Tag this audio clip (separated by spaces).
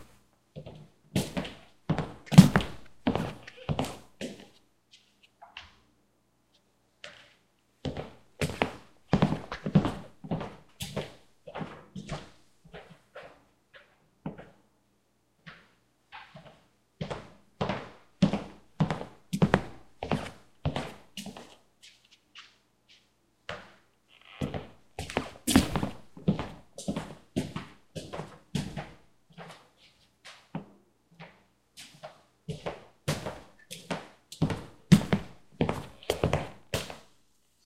floor footsteps steps walking wood